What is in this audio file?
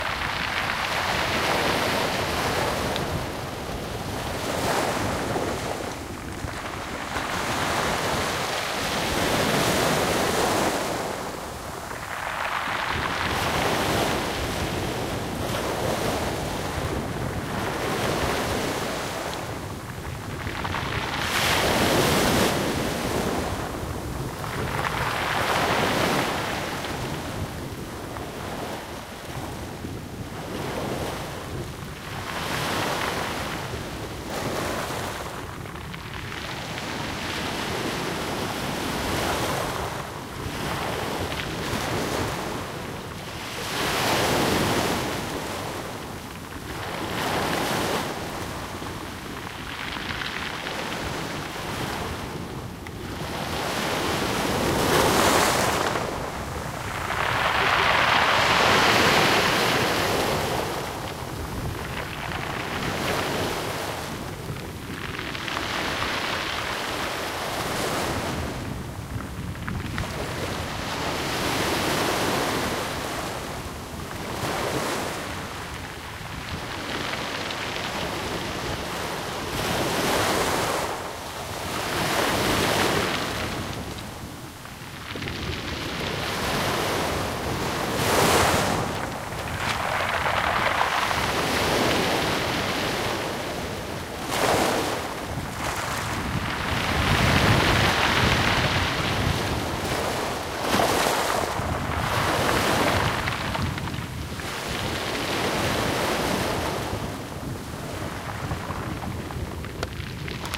Rocks and Surf at Nobska-5
At Nobska Beach, Woods Hole, Massachusetts. Breaking waves and water running over a large deposit of pebbles at the waterline. Recorded April 15, 2012 using a Zoom H2.